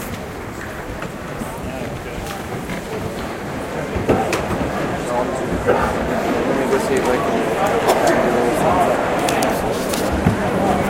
Recorded with a black Sony IC voice recorder.